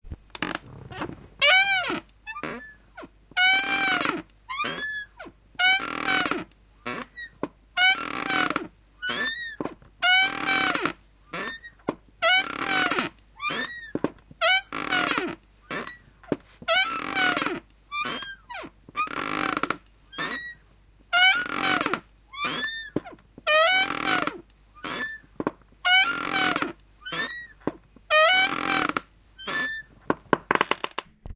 Creaking Rocking chair 2
The sound of a very creaky rocking chair
creak, creaky, creaky-rocking-chair, rocking-chair, squeak, squeaking